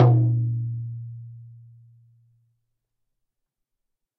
Shaman Hand Frame Drum 04
Shaman Hand Frame Drum
Studio Recording
Rode NT1000
AKG C1000s
Clock Audio C 009E-RF Boundary Microphone
Reaper DAW
percussion, shaman, drum, hand, frame, shamanic, percs, percussive, bodhran, drums, sticks